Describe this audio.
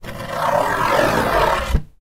Box 25x25x7 thin ROAR 006
The box was about 35cm x 25cm x 7cm and made of thin corrugated cardboard.
These sounds were made by scrapping the the box with my nail.
They sound to me like a roar.